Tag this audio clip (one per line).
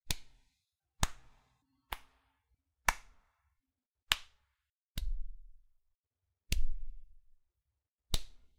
catch
hand
hands
skin